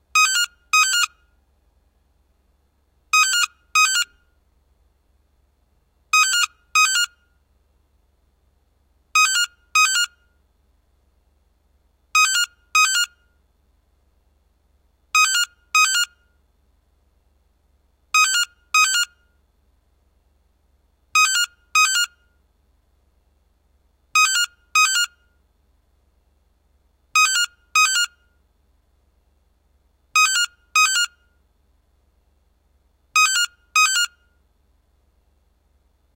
Office phone
A simple recording of a phone.
phone, british-phone, telephone